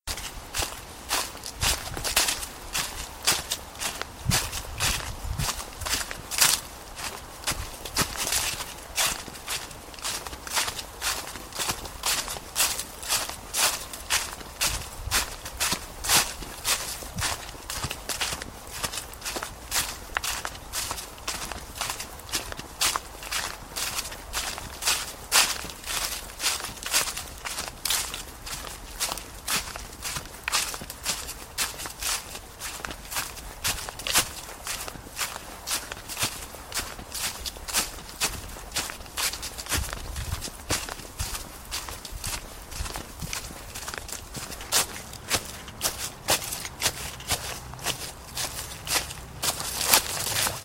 Footsteps, Dry Leaves, B
Raw audio of footsteps through dry crunching leaves down a footpath. It consists of two separate recordings I took and later snipped together to make a longer recording.
An example of how you might credit is by putting this in the description/credits:
Dry, Footstep, Crisp, Crunch, Footsteps, Leaves, Leaf